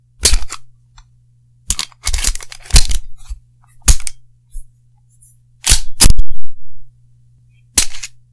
Loading and cocking a pistol
Ejecting the magazine, re-inserting the magazine, racking the slide, loading the gun, ejecting the magazine again. No gunfire. Bersa Thunder .380